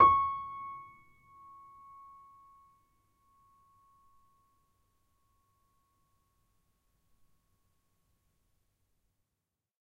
upright choiseul piano multisample recorded using zoom H4n
upright, choiseul, piano, multisample